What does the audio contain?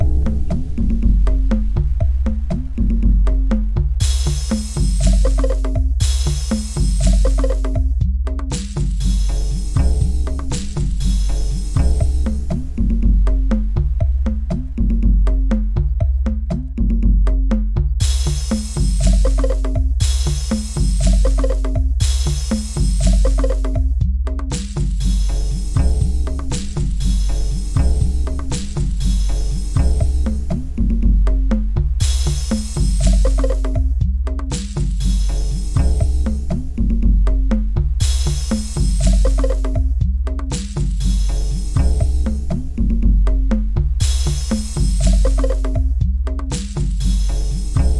Here's an African beat with my spin on it. I put this together for a friend.
African, Afro, Backing, Beats, Free, House, Jam, Keyboards, Music, Trance, Traxis, World, Yovi